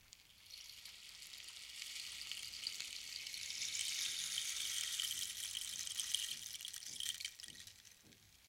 Rainstick Slow
Native Wooden Rain Stick Hit
Homemade Recording
Part of an original native Colombian percussion sampler.
Recorded with a Shure SM57 > Yamaha MG127cx > Mbox > Ableton Live
Rain, sample